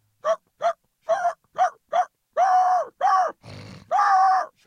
Human impersonation of a dog. Captured with Microfone Condensador AKG C414.
bark, animals, 3naudio17, dogsound, dog